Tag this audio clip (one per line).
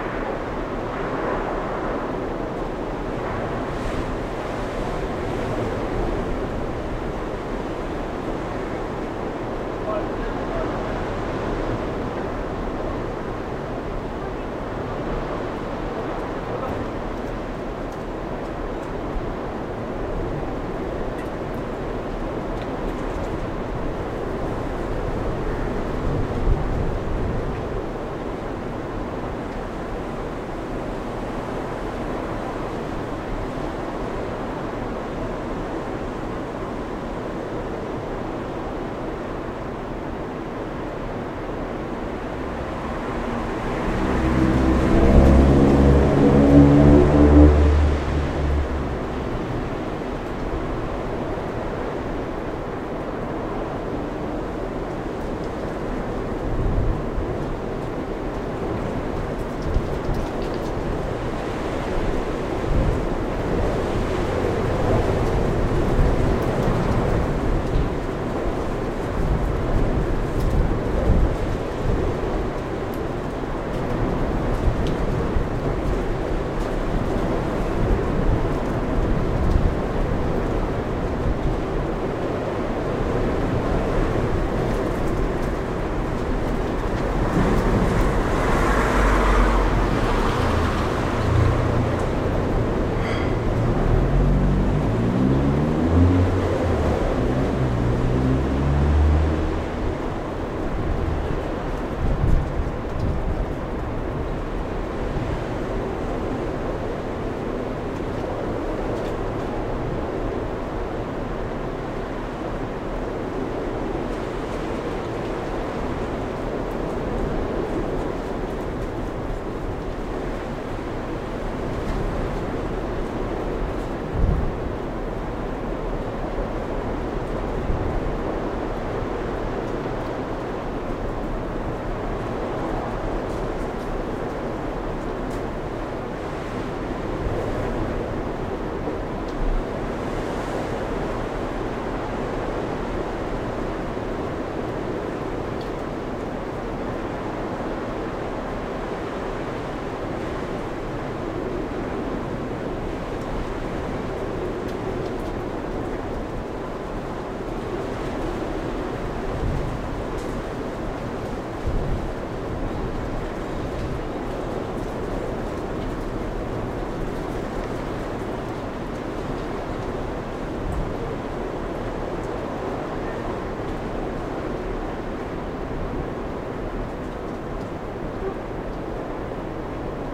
storm
wind
woosh